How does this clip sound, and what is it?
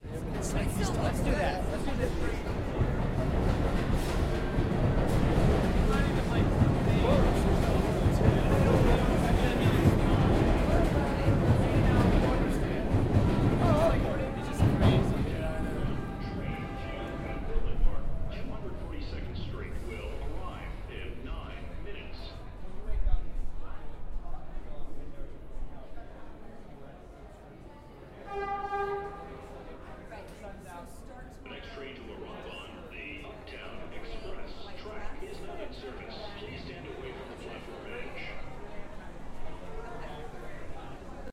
NYC Subway train, in transit, loud walla, noise, slowing down, honk
NYC_Subway train, in transit, loud walla, noise, slowing down, honk